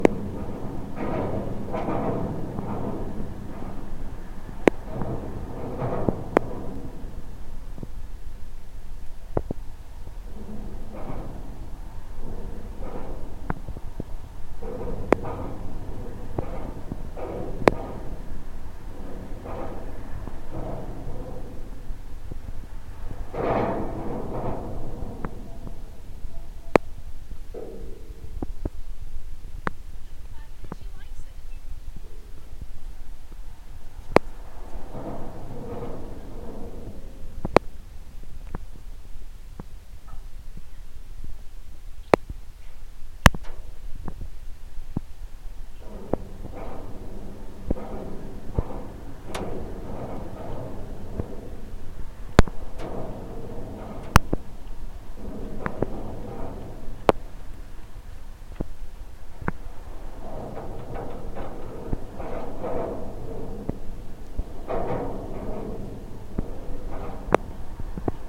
GGB tower SEE
Contact mic recording of the Golden Gate Bridge in San Francisco, CA, USA at the south tower, east leg, east face. Recorded December 18, 2008 using a Sony PCM-D50 recorder with hand-held Fishman V100 piezo pickup and violin bridge.